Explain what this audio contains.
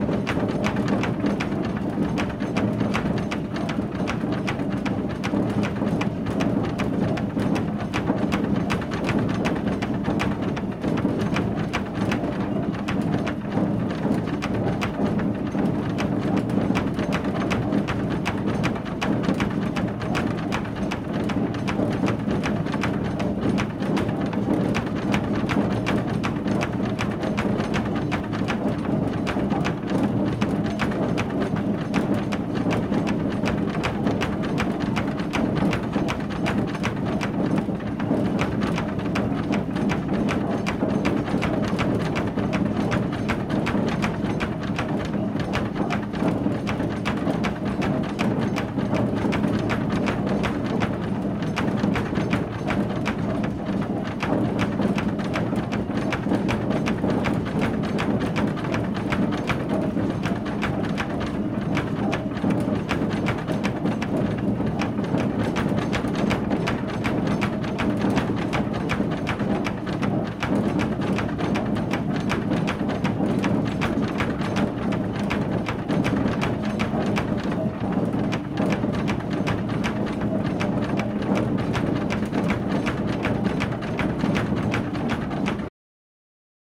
These sounds come from a water mill in Golspie, Scotland. It's been built in 1863 and is still in use!
Here you can hear the rattling box with the the grains in it and the big gears going in the background.